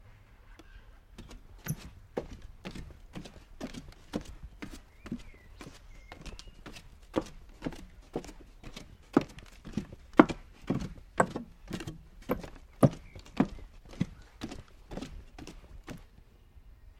Footsteps outdoors wood path squeak
walking wooden path with some squeaky wood under tension
footsteps, squeak, boardwalk, wood, walk, board